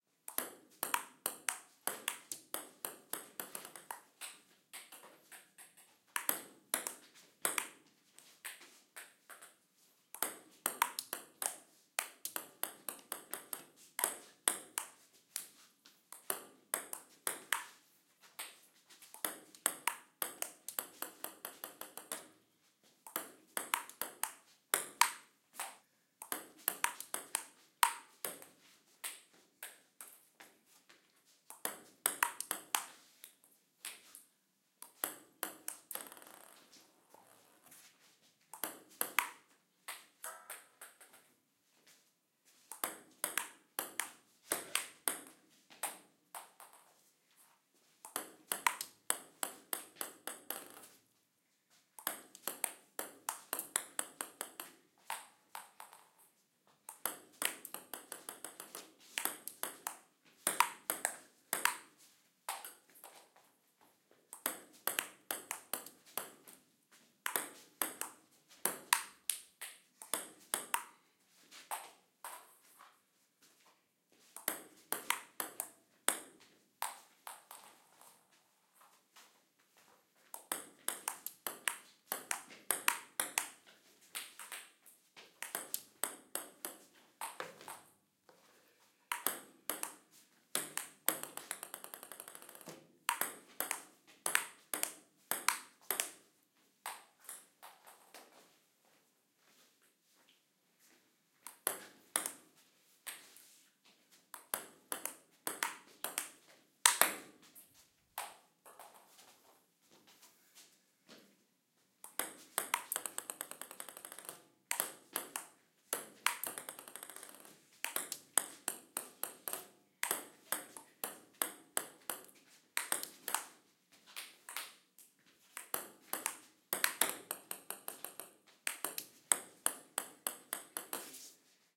Ping-pong sounds
net, tennis, racket, pong, table, flick, ping, sport, ball